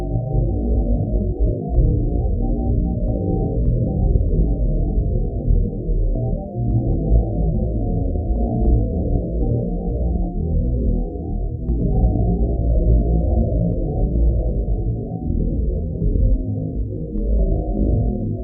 Claustrophobia - Supercollider
additive synthesis with supercollider
sci-fi, phobia, horror, supercollider, additive, synthesis